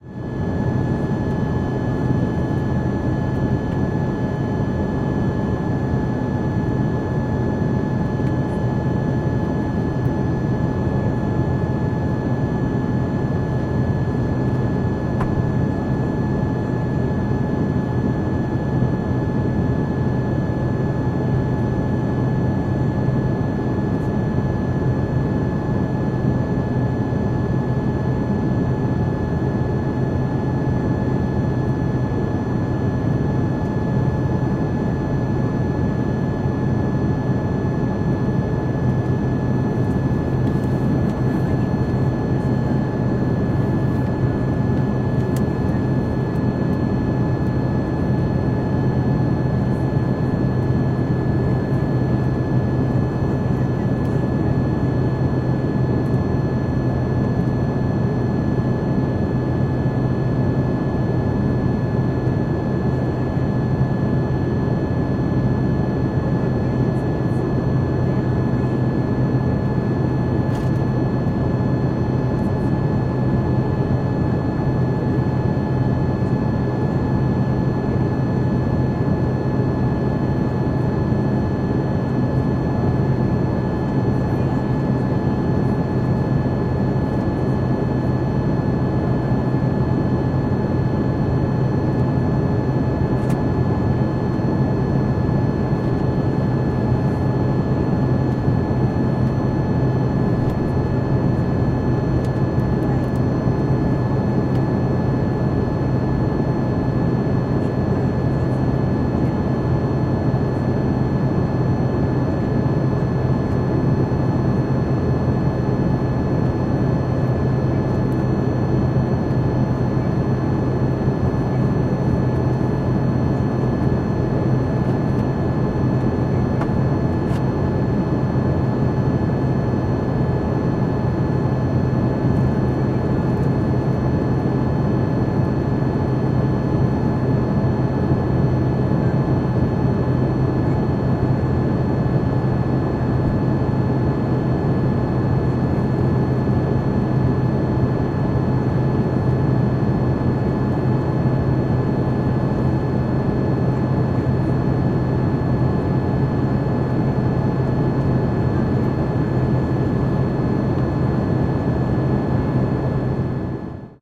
Embraer 175: Cruising Altitude
Embraer 175 at cruising altitude
cruising, embraer, jet, calm, altitude, cruise, rumble, sky, airplane